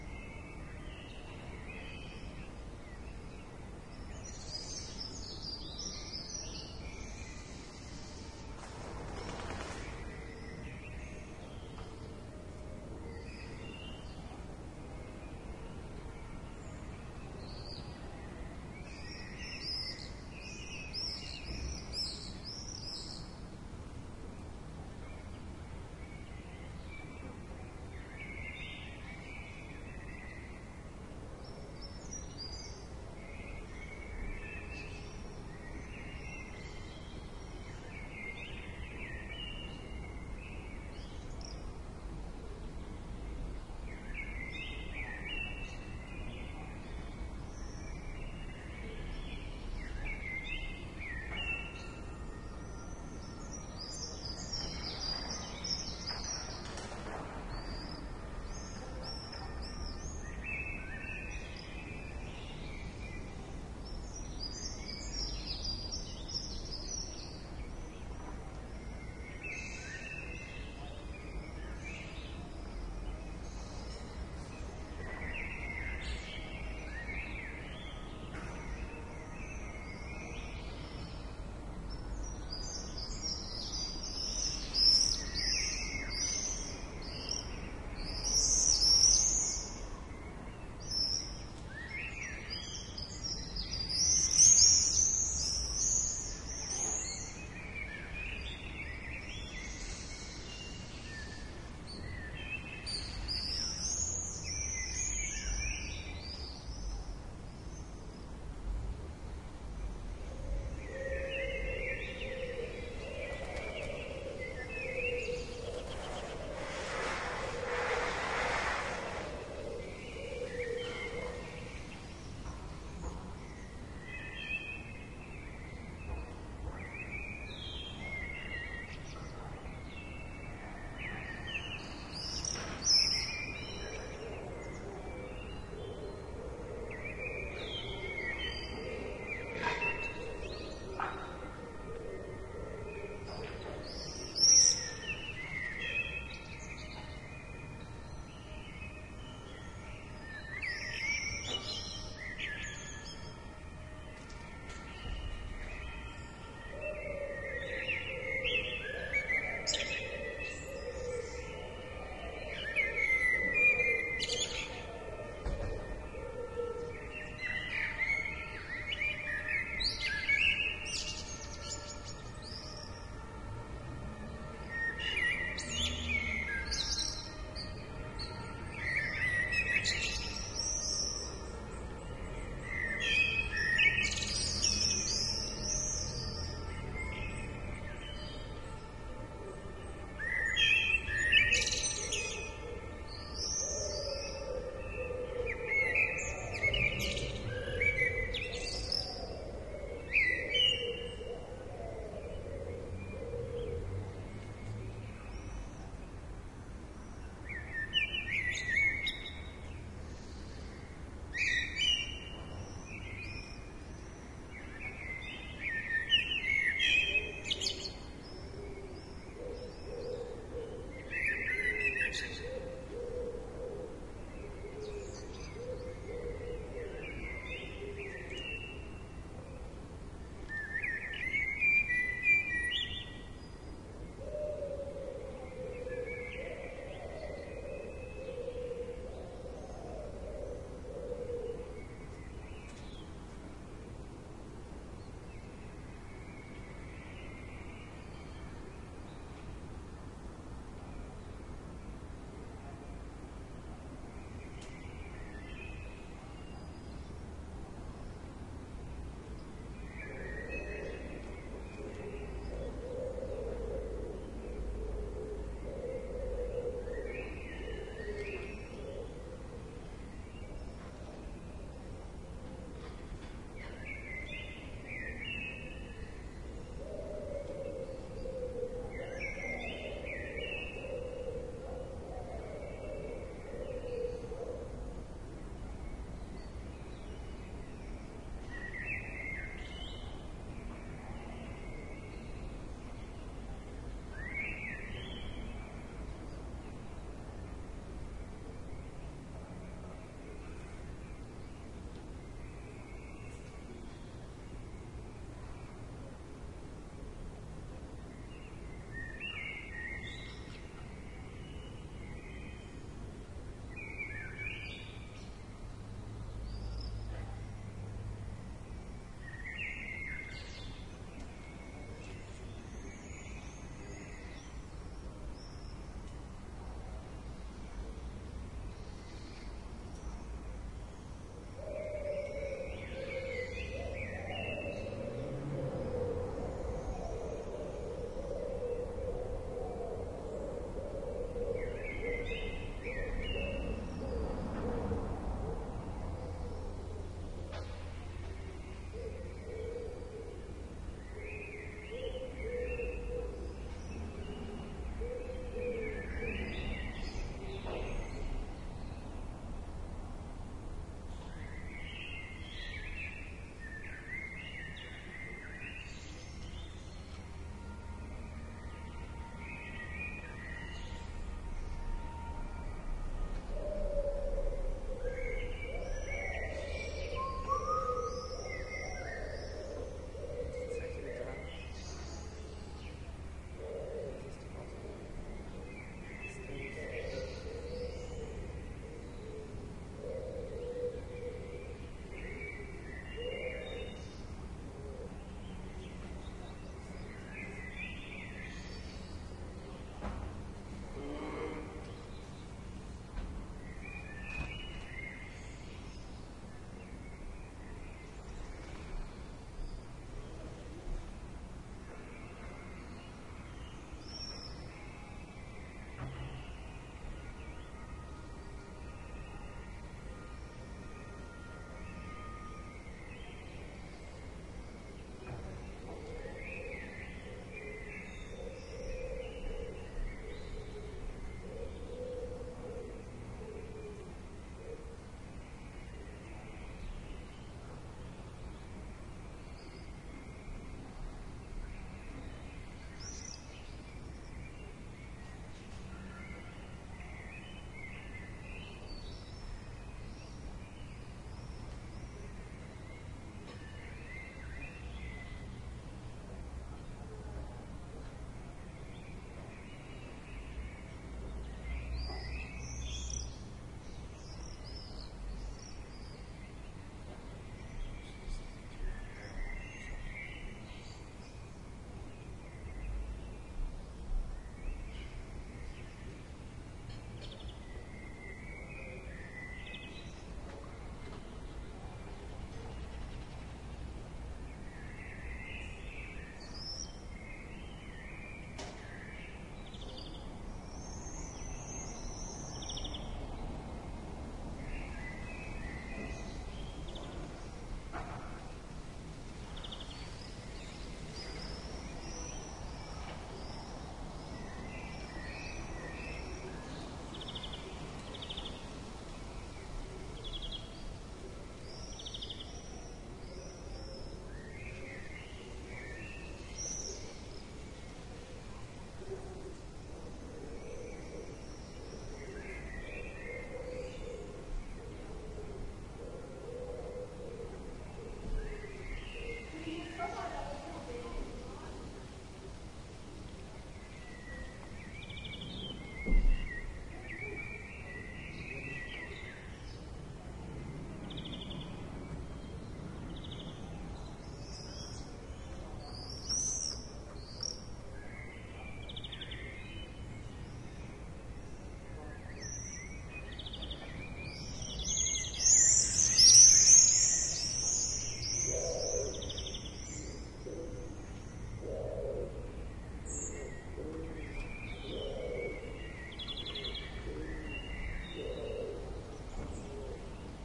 This recording was done just to test some gear, a HHB Portadisc MDP 500 (which I haven´t used for quite some while) and the AT 835ST microphone. City noises and birdsong is what you hear. Recorded in June 2009.